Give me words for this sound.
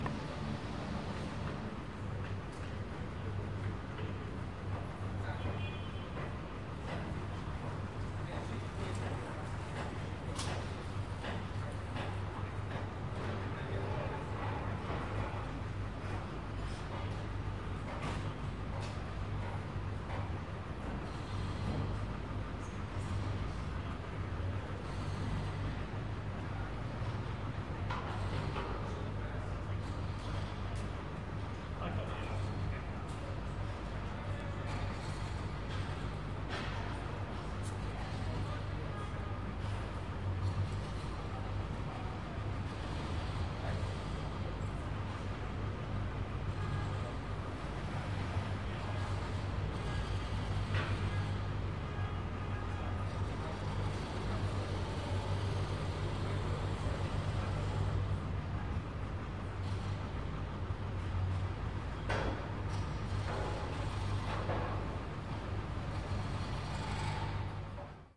Side Street City Distant Construction Traffic Voices
City, Construction, Distant, Side, Street, Traffic, Voices